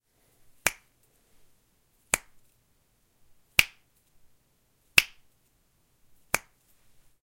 This Foley sample was recorded with a Zoom H4n, edited in Ableton Live 9 and Mastered in Studio One.
clap, compact, Foley, microphone, movement, percussive, sound, transient